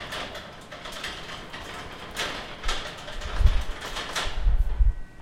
Sound for a spaceship or Prison.
abstract, prison, ship, space